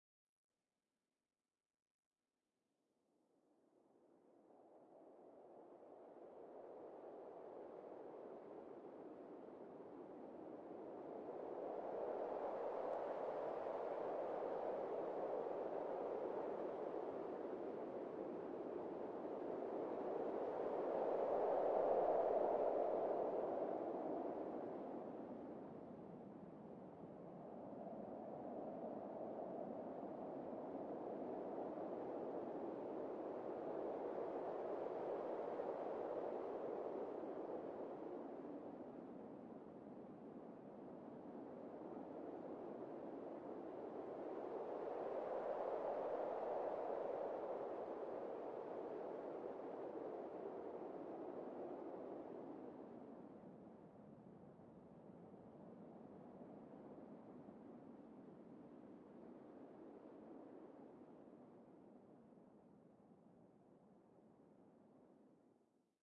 Storm Wind 2
A series of samples that sound like a really windy day. I imagine the wind rushing by on an autumn day creating random whirling and whooshing sounds. These samples have been created using my own preset on the fabFilter Twin 2 Soft Synth. I had great fun experimenting with the XLFO and Filters to make these sounds.
Stormy, Whirling, Day, Breezy, Autumn, Whooshing, Sounds, Natural, Nature, Wind, Windy